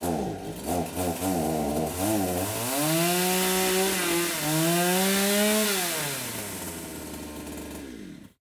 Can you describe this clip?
Some chainsaw recorded with Zoom H5
recording, h5, Chainsaw, saw, zoom, field